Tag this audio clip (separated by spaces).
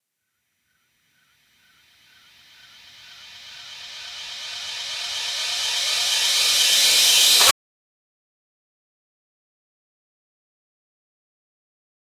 metal cymbal fx echo reverse